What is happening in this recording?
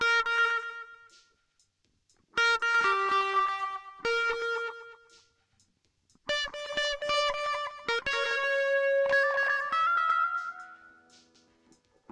paul t high echo tube loop 1
echo guitar sounds